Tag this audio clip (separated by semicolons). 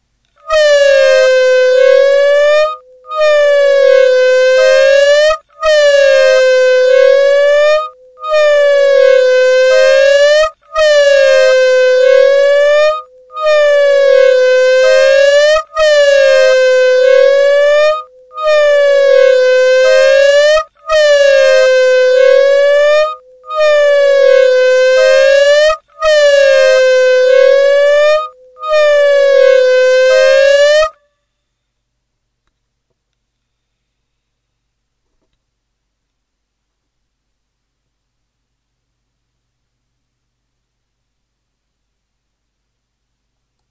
music; sounds; or; lumps; fragments; bits